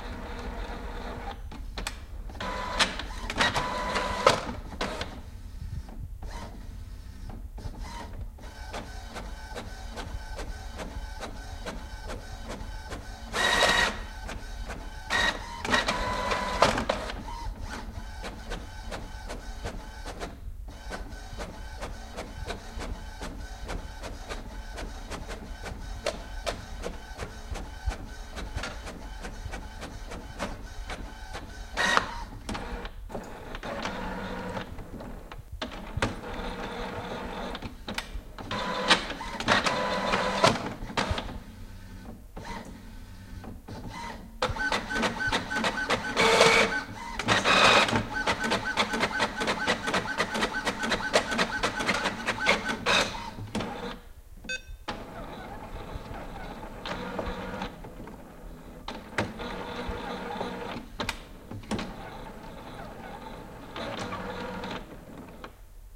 Printer from am PC